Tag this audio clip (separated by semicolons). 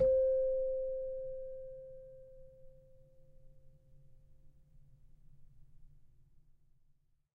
celeste; samples